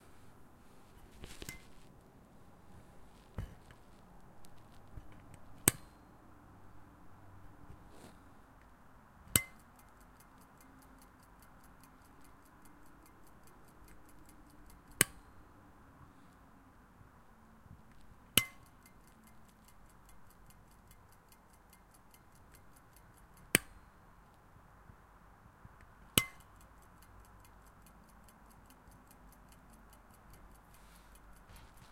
Clock Switch OnOff
Switching on and off an old mechanical clock incl. ticking.
Un-processed recording with my Tascam DR-40
ticking, Switch, mechanical, clock